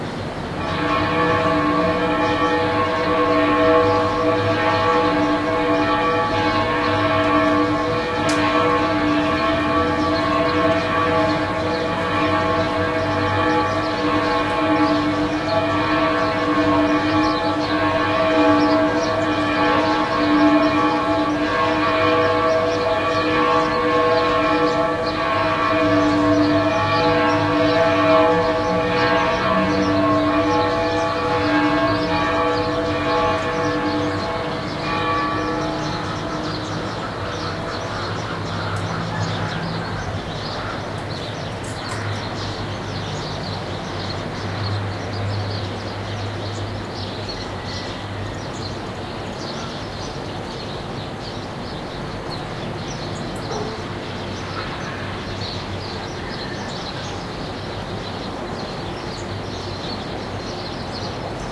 20101205.05.morning.bells
Pealing bells, traffic noise and chirping birds in background. Recorded at La Paz downtown, Baja California, Mexico with Shure WL183 mics into Fel preamp and Olympus LS10 recorder.